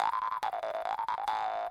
Vargan PTD loop 003
Vargan -> Oktava MKE-15 -> PERATRONIKA MAB-2013 -> Echo MIA midi.The timbre of the tool is lowered.
khomus, vargan